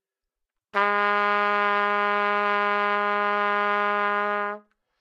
Trumpet - Gsharp3
Part of the Good-sounds dataset of monophonic instrumental sounds.
instrument::trumpet
note::Gsharp
octave::3
midi note::44
good-sounds-id::2825
Gsharp3, single-note, neumann-U87, good-sounds, multisample, trumpet